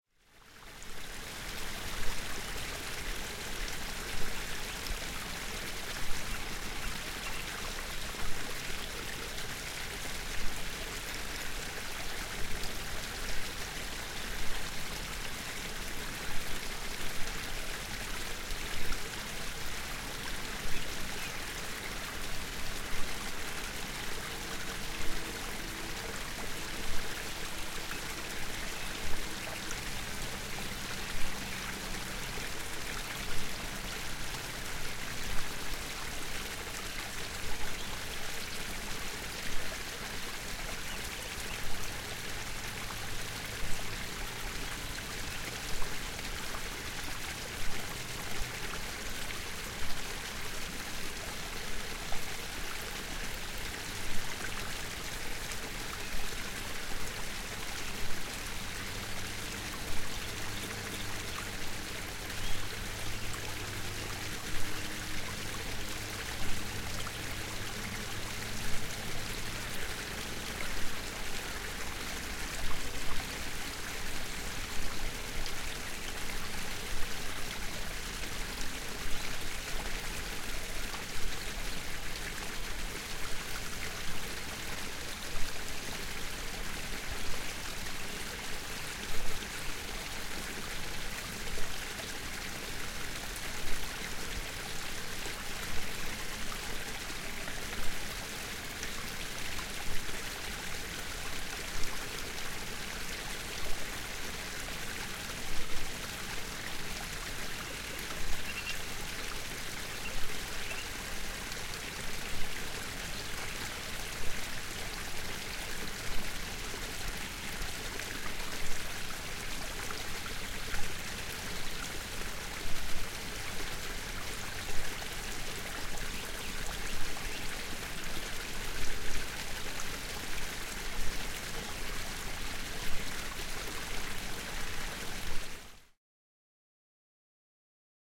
Larger stream with crows in forest
This sound recording was made at a noisy place of a small river in the forest. in the background you can hear some crows.
ambient, crows, forest, nature, water